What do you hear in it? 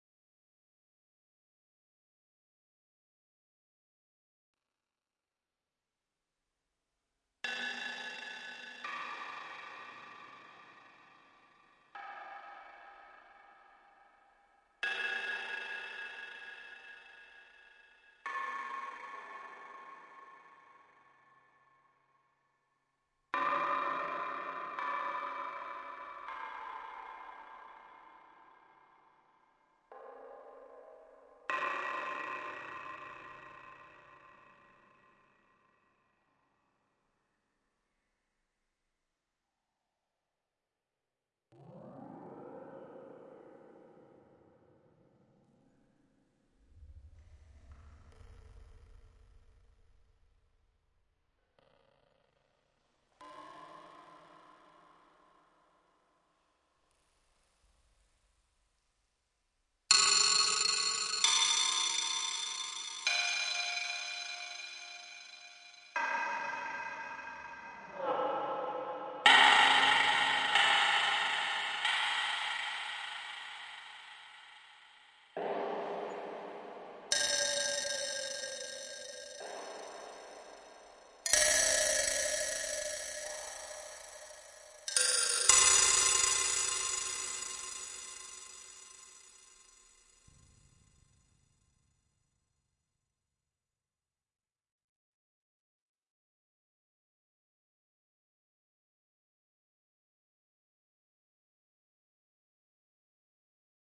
02 barreau bunker space echo
strange sound design. First step of processing of the bunker bar sample in Ableton.
sounddesign, sfx, strange